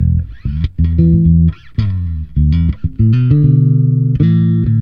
FunkBass GrooveLo0p Fm 5
Funk Bass Groove | Fender Jazz Bass
Funk, Soul, Bass-Groove, Bass-Loop, Synth-Loop, New-Bass, Bass, Fender-Jazz-Bass, Beat, Bass-Recording, Fretless, Drums, Funky-Bass-Loop, Jazz-Bass, Ableton-Bass, Logic-Loop, Groove, Fender-PBass, Funk-Bass, Ableton-Loop, Synth-Bass, Compressor, Bass-Sample, Hip-Hop, Bass-Samples, Loop-Bass